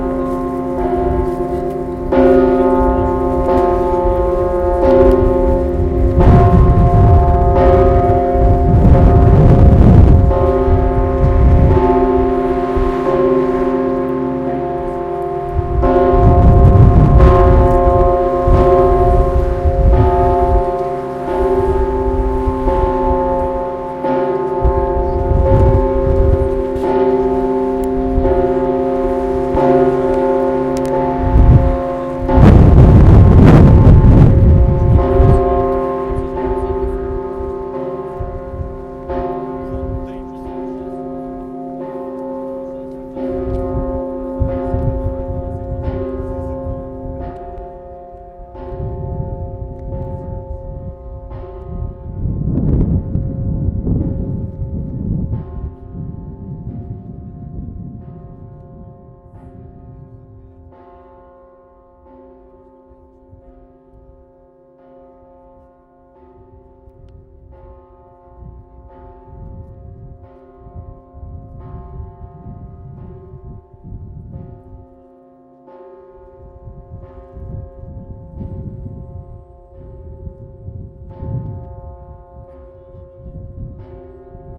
Moscow Cathedral Christ the Saviour
The bells chiming on a windy evening at Moscow Cathedral of Christ the Saviour